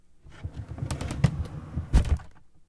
Opening File Cabinet - Foley
A large file cabinet being opened
cabinet, door, open